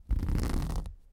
The sound of scratching my couch.
scratching, Sound-Design